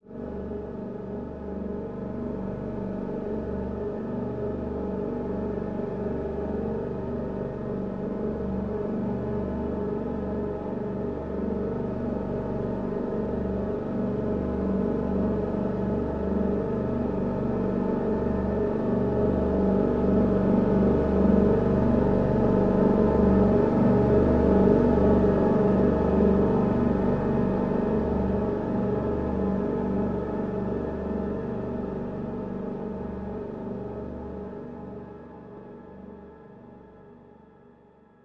helicopter / submarine engine ambient sounds.
Made with an Arp Odyssey (synthesizer)
ambient, arp-odyssey, sounds, synth-made